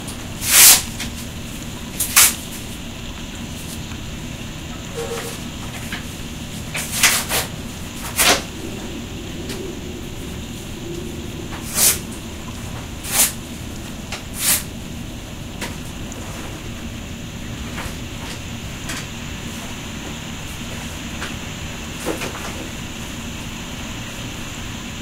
ripping paper ambix test
ambeo, zoom, foley, ripping, B-format, paper, ambix, sennheiser, ambisonic, f4